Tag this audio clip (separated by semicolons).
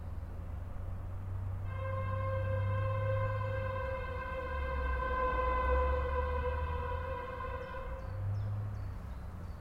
car; honk; horn; toot; traffic